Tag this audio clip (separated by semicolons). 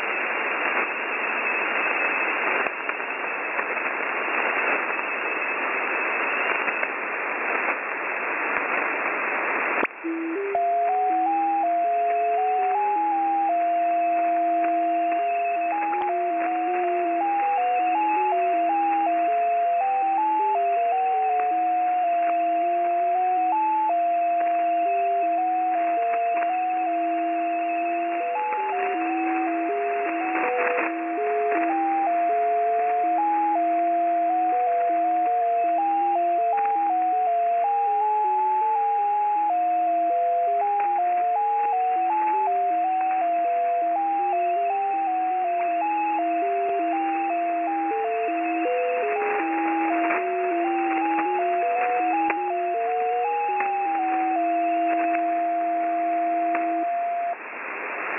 the-14077-project; numbers-station; shortwave; radio; encrypted-content; tones; static; melody; music; mysterious; 14077; mystery